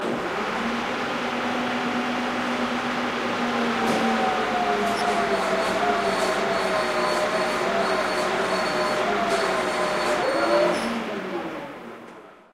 Piranha pmb hydraulic rampe going down